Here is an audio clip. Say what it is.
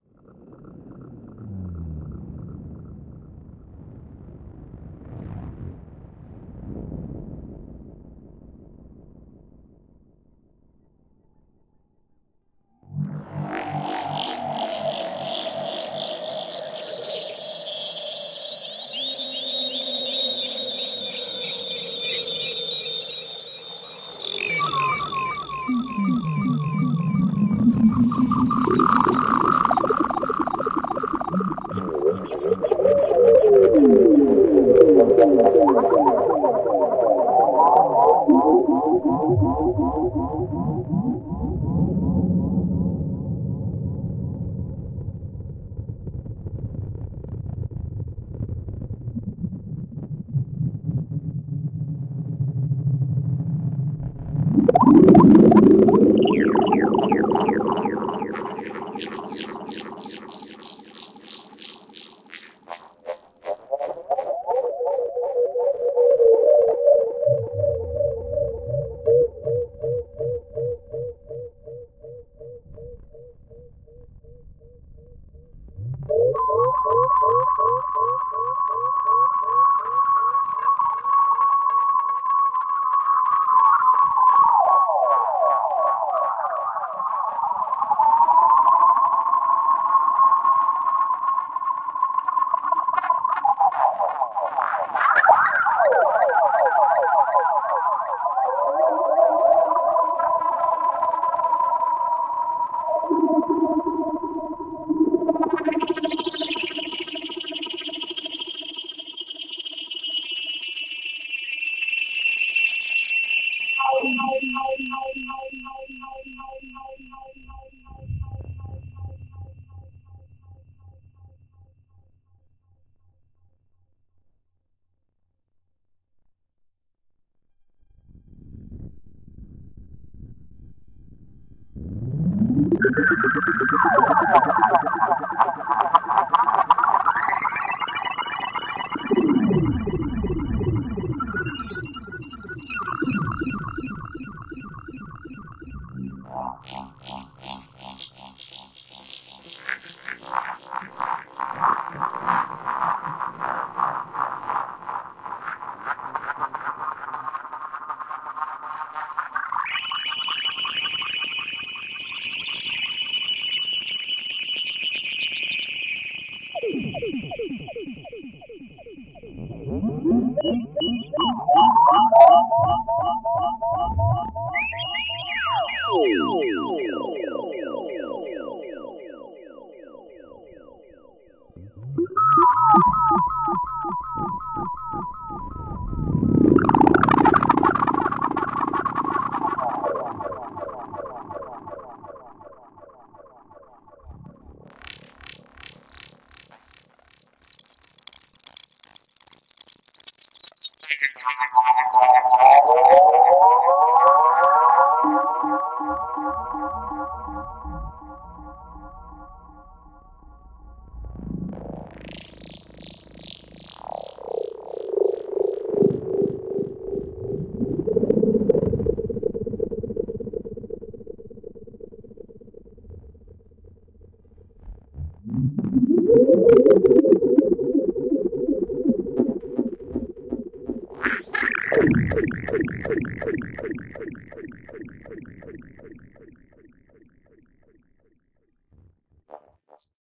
ESERBEZE Granular scape 11
This sample is part of the "ESERBEZE Granular scape pack 1" sample pack. 4 minutes of weird granular space ambiance.